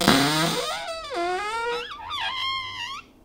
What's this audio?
One in a series of some creaks from my cupboard doors. Recorded with an AT4021 mic into a modified Marantz PMD661 and edited with Reason.
kitchen, close, open, door, cupboard, creak, foley